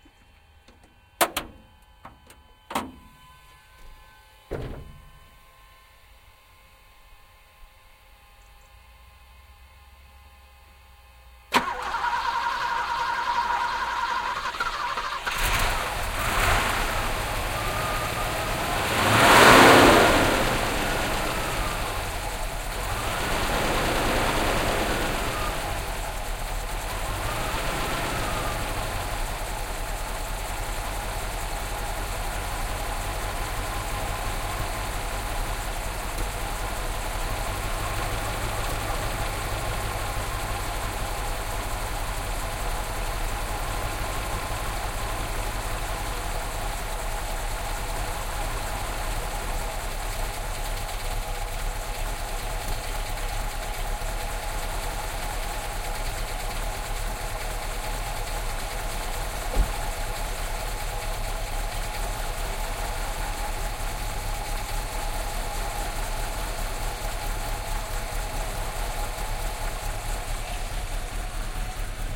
phils car
Opening of the hood of the car and listening how Phil starts his 1975 Mercedes 450 SEL 6.9 liters. It's an amazing limousine-like car with a ridiculously heavy engine (as you can hear). After making the engine rev, you can hear it idling. An amazing sound for an amazing car.